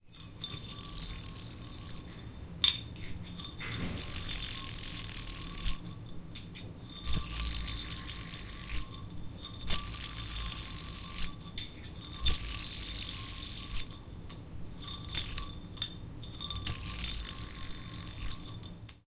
here is the sound of a yo-yo spinning at the bottom of the string